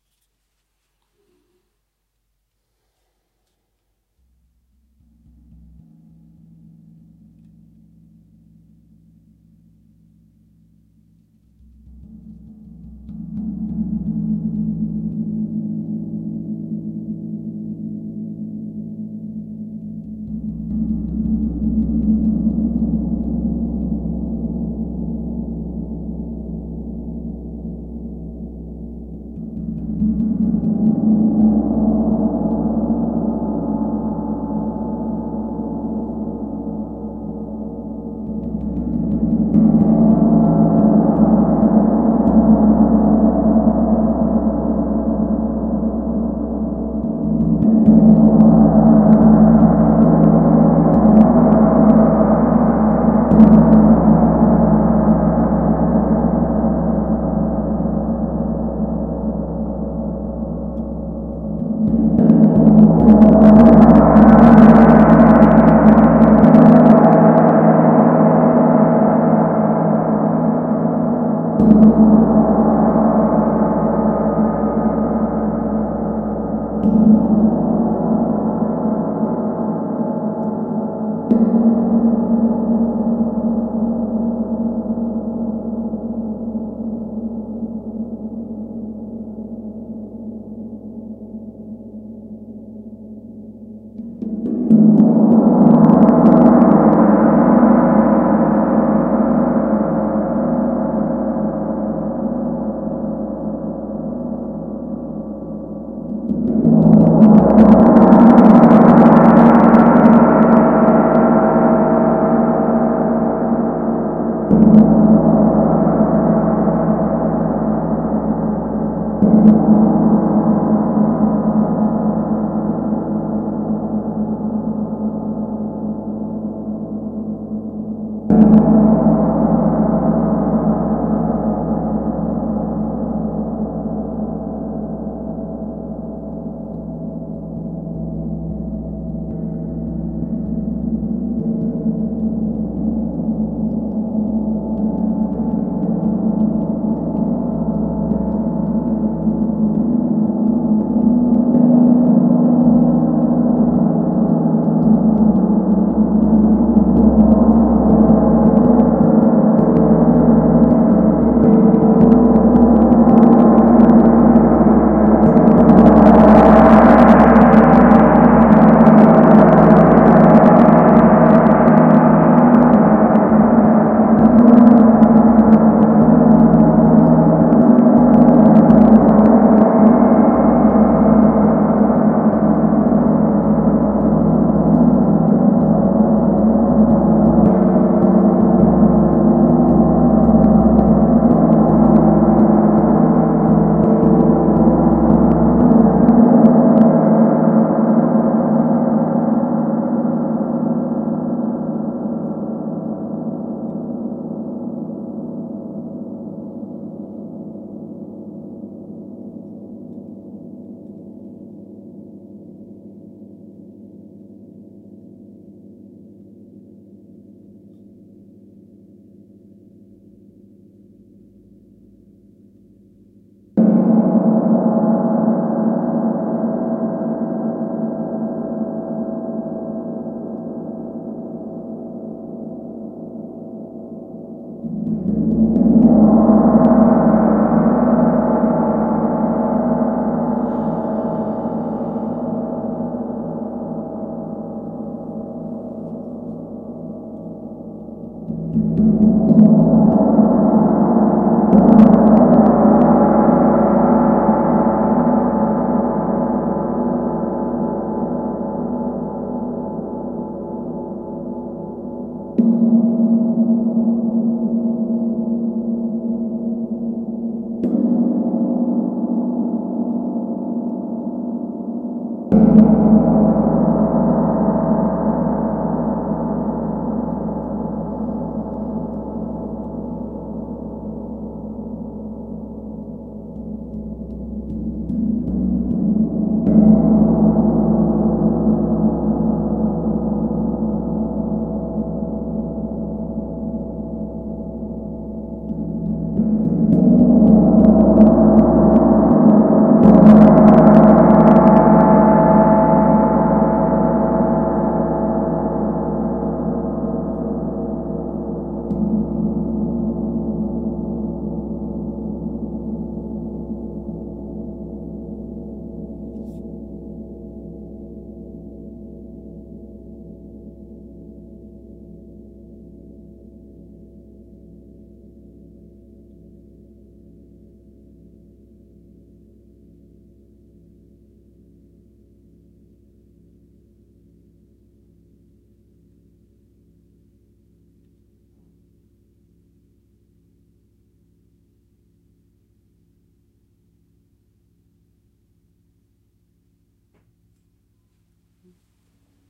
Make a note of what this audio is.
huge gong sound in studio